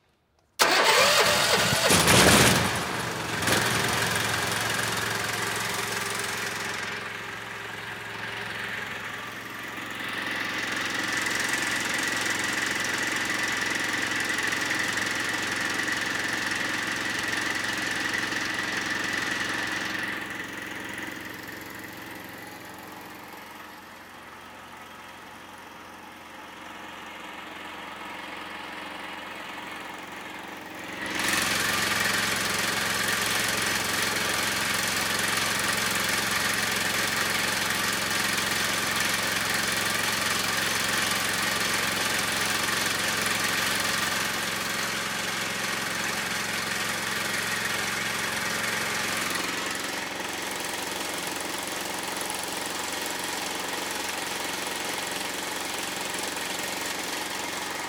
water pump motor (or could be generator) start and run various sharp close Gaza 2016
generator, motor, pump, run, start, water